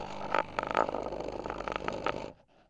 When I am making an expresso on my coffee machine, the cups sometimes rattle one against the other due to the vibration and make an interesting noise.
I have tried to setup my homemade stereo contact mic by attaching one of the piezos to each cup with sticky tape.
During the recording I realized the wiring on the contact mics was a bit defective. One of the mics was not working properly, so I ended up with only two small clips that were stereo and useable...
The other portions of the recording one of the mics was not working or was too noisy, so I ended up with only one useable channel.
rattle, vubration, expresso-cup, contact-mic, coffe-machine, homemade, piezo